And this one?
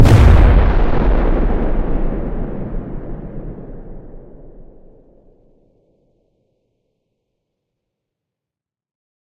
A totally synthetic explosion sound that could be the firing of a large gun instead of a bomb exploding. The reverberant tail is relatively long, as though the explosion occurs in a hilly area. But you can reshape the envelope to your liking, as well as adding whatever debris noise is appropriate for your application. Like the others in this series, this sound is totally synthetic, created within Cool Edit Pro (the ancestor of modern-day Adobe Audition).
bomb, good, gun, synthetic